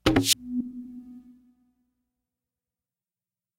Conga Reverse
Cell notification I made using a Korg Electribe ESX